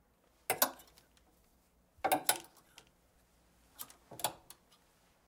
hanging up your clothes
class, sound, intermediate